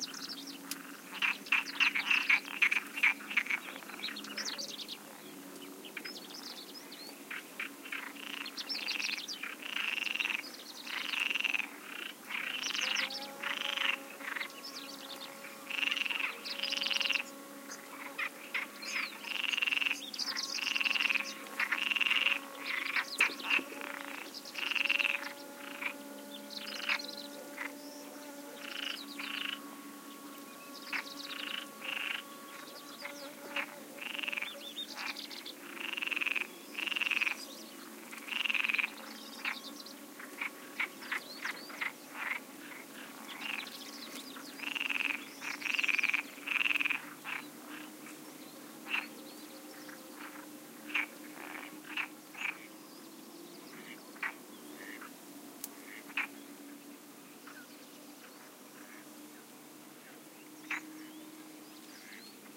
20060326.marshes. beefly02
frog croaks, birds, and a single beefly flying near the mic. Rode NT4> FelMicbooster>iRiverH120(rockbox) / croar de ranas, pájaros y un bombilido volando cerca del micro
birds, field-recording, frogs, insects, marshes, nature, south-spain, spring